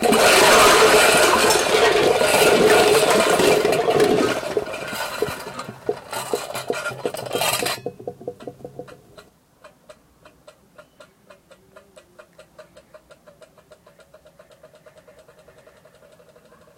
I put all my 'rolling can' sounds together in Audacity to create a giant crash out of the sounds.
tin-can, can, big, roll